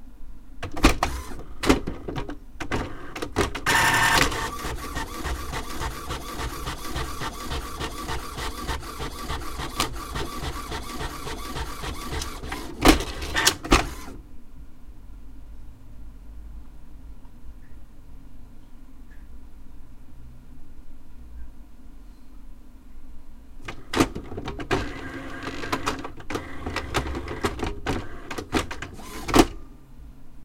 A field record of our printer.
HP Deskjet 3744
(HP Deskjet 3740 Series)
Recorded with Audio Technica ATR2500 Condenser Microphone